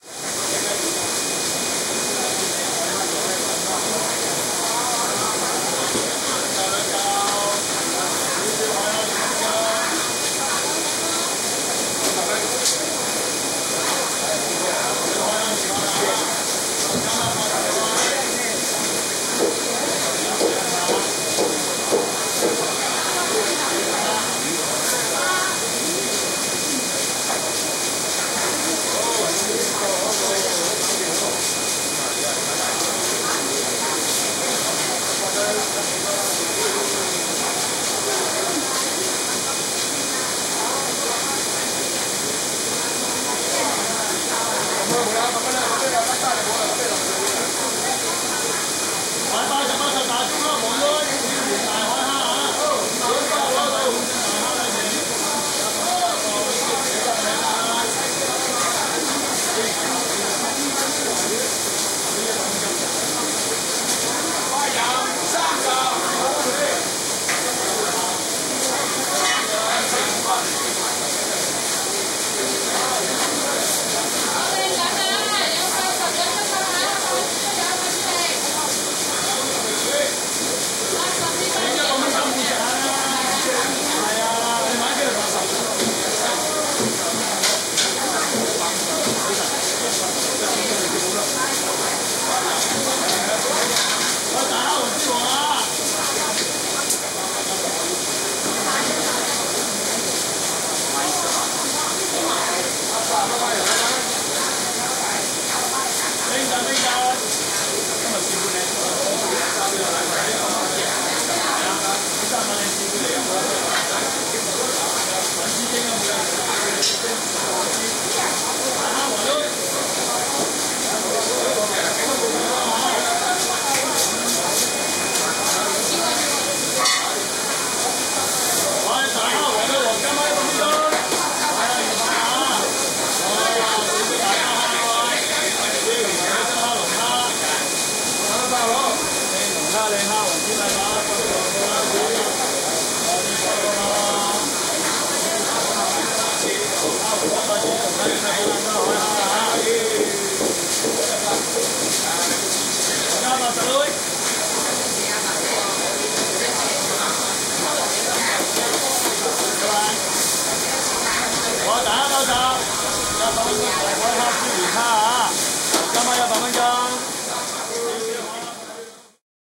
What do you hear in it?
HK fish market
Ambient sound from a small indoor fish market in Hong Kong. The high-pitched tone is not a recording fault; it was actually present in the space. I pulled it down quite a bit using EQ as it was actually much louder on the scene.
Asia, market, Chinese, fish-market, Hong-Kong, fish, China